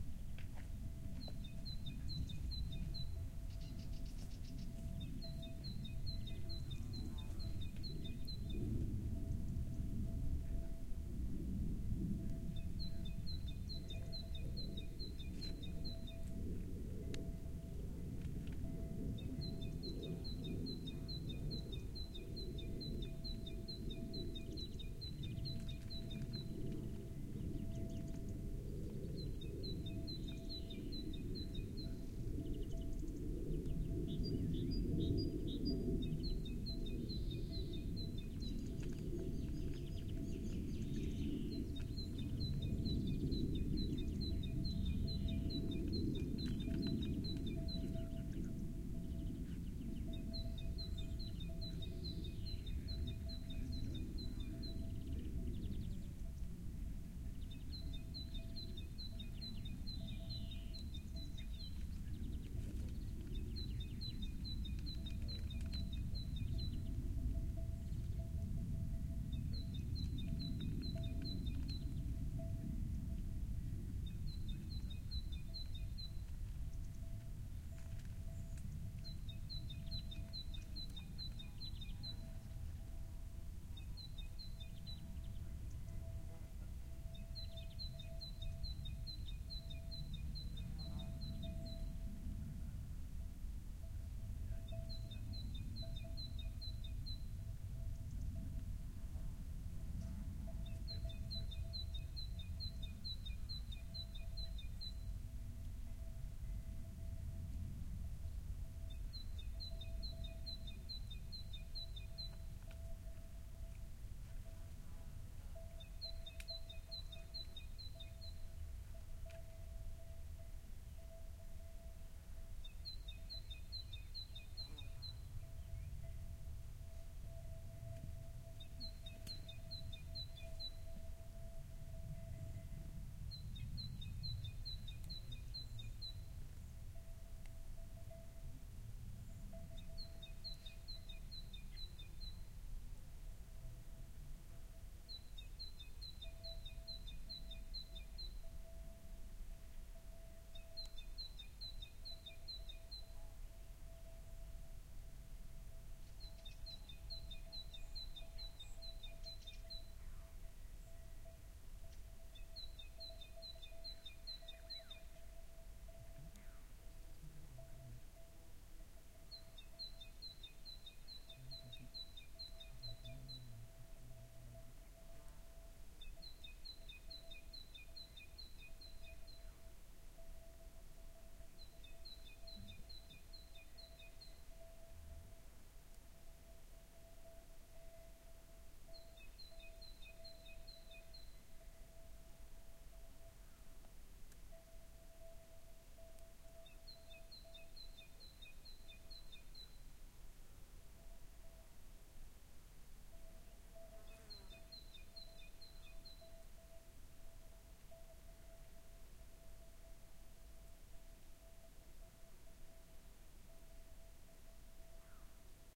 20110115 sierra.ambiance.02
soft natural ambiance, with an airplane flying high, birds singing, sheep bells ringing, and low voices in conversation. Recorded at at Sierra de Grazalema (S Spain) with a apair of Shure WL183 mics into a PCM M10 recorder (set to low gain)
ambiance, south-spain